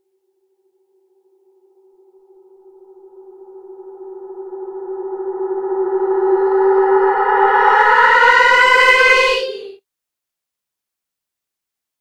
This was the sound of sombody yelling after I reversed it and added reverb to it and reversed it again. Made with Audacity.